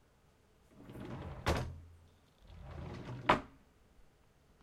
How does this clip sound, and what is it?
opening desk drawer
the sound of a dormitory desk drawer being pulled out, then closed. recorded with SONY linear PCM recorder in a dorm room. recorder was placed on the table containing the drawer, right above the drawer.